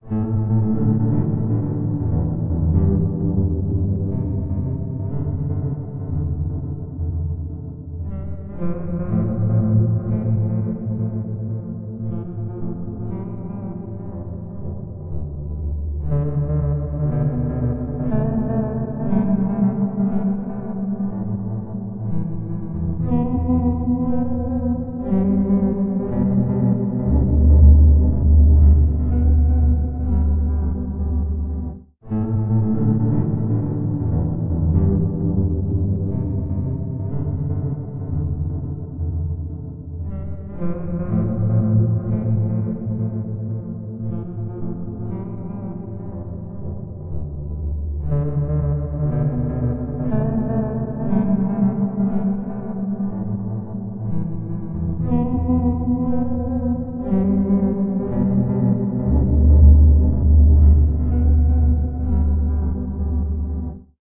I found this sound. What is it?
sweet and deep ambiant melody at 120 bpm, on Reaktor.